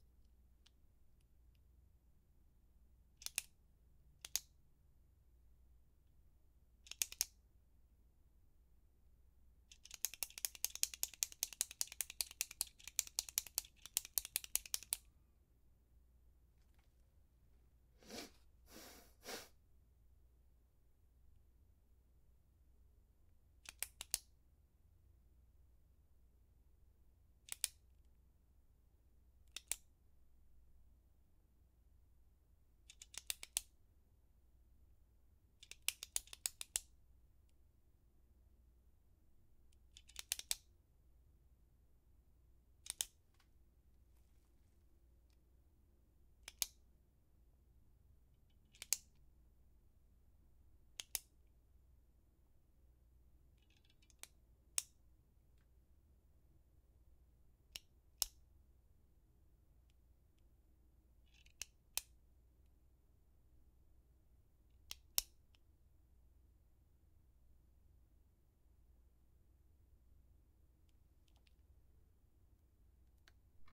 Ballpoint pen clicking in an open area so the sound will fall off instead of a having a reverb that colors the image.
clicks, Pen